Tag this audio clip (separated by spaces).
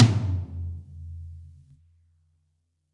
pack middle kit tom realistic set drum drumset